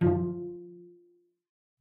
One-shot from Versilian Studios Chamber Orchestra 2: Community Edition sampling project.
Instrument family: Strings
Instrument: Cello Section
Articulation: tight pizzicato
Note: E#3
Midi note: 53
Midi velocity (center): 95
Microphone: 2x Rode NT1-A spaced pair, 1 Royer R-101.
Performer: Cristobal Cruz-Garcia, Addy Harris, Parker Ousley
cello, vsco-2, midi-note-53, cello-section, esharp3, strings